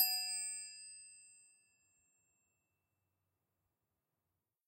Softer wrench hit F#3
Recorded with DPA 4021.
A chrome wrench/spanner tuned to a F#3.